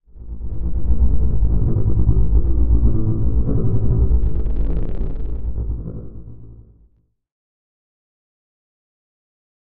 Created using Ableton Live. Combination of multi-sampling, resampling, and effects.